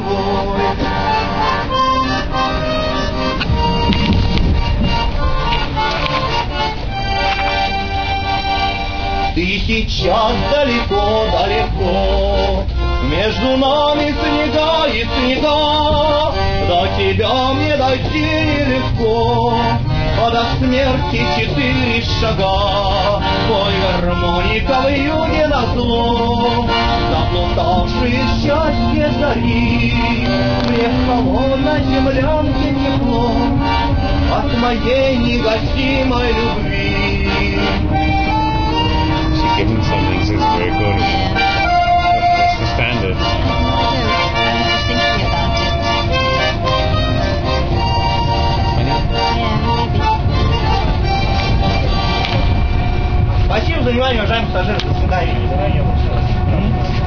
A Russian accordionist turns up, breaks into song, and leaves without really collecting any money on a night train outside Moscow.